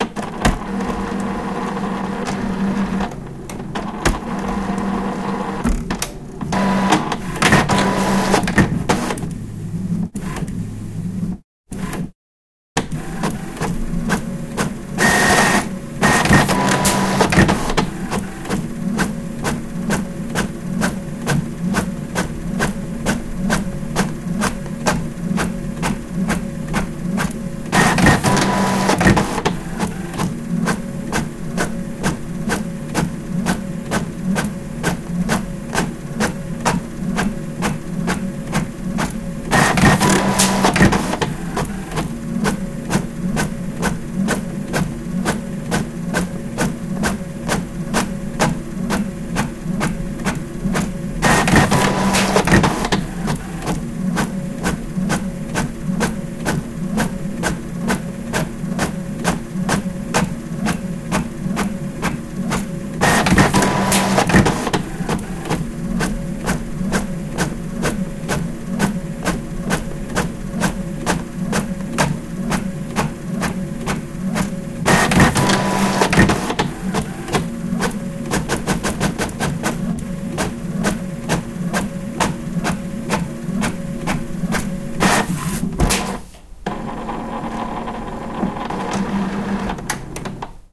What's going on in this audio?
Inkjet printer: Print multiple pages.